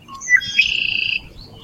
Bird song at Spfd Lake
Bird song recorded at Springfield Lake in Springfield Missouri U.S.A.
ambience, field-recording, nature